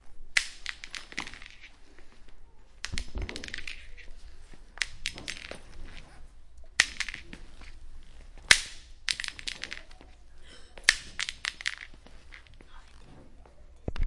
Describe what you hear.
Sonicsnap LGFR Alicia Inès Iman Yuna
Field recording from Léon grimault school (Rennes) and its surroundings, made by the students of CM1-CM2 (years 5).